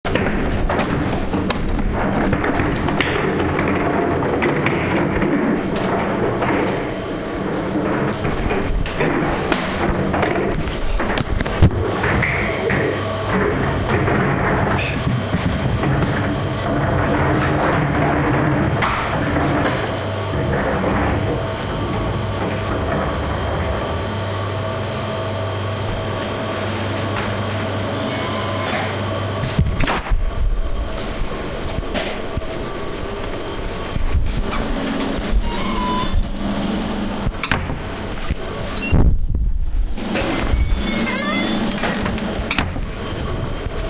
industrial sound design